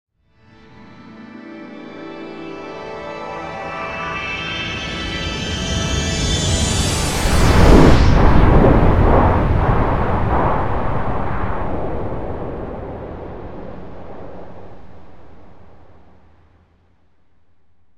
Blast Off
A digital build-up leading to a massive missile launch / explosion / hyperdrive effect.
rocket, blast-off, launch, whoosh, takeoff, explosion